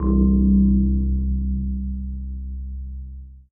Piano grave
Note of Piano Mi E